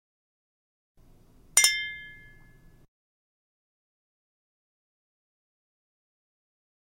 Two half-full wine glasses clinking together in a toast - appeared in Ad Astral Episode 4 "DREAM GIRL".